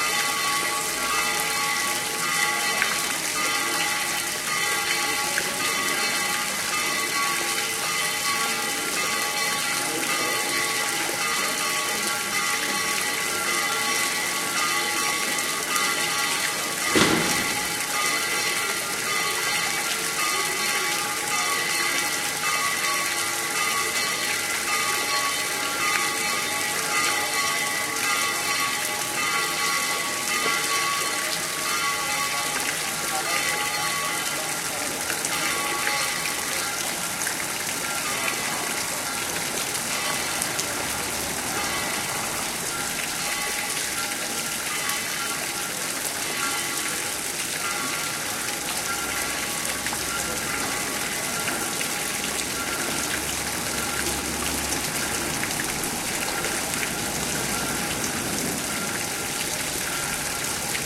20100919.magdalena.fountain
water falling in a fountain, bells pealing in background. Olympus LS10 internal mics. Recorded at Plaza de la Magdalena, Seville, Spain
field-recording, pealing, splashing, seville, spain, fountain, bell, water